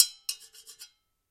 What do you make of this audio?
Stomping & playing on various pots
0, egoless, natural, playing, pot, rhytm, sounds, stomps, various, vol